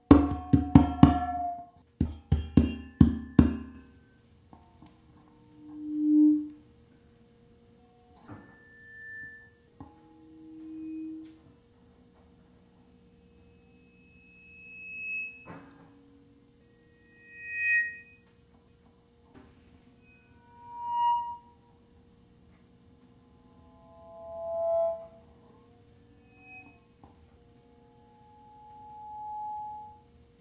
PA microphone feedback (2)
This is the "cliche" sound of someone tapping on a public address microphone before speaking. This has a bit more feedback than (1)
I used an EV635 microphone hooked up to an amp. The PA speaker is positioned incorrectly behind the microphone, the volume is too loud, the EQ is off and the room is live - so there's a ringing or feedback.
I took the AKG condenser microphone out of the audio booth and brought it into the studio to record the amp set-up. Encoded with M-Audio Delta AP
feedback, microphone, noise, pa, tapping